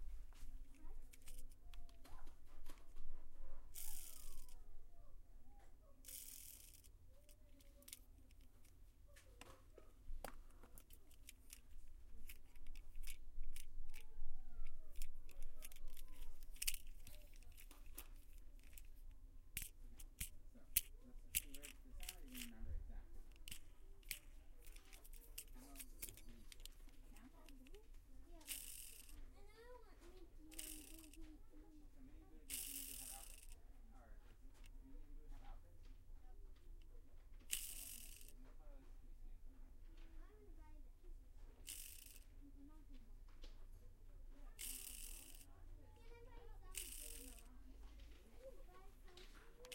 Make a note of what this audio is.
spinning racecar wheels, part 2
one in a series of recordings taken at a toy store in palo alto.
acceleration, exponential-velocity, metal, race-car, racecar, spinning, toy, toy-store, wheels, whirring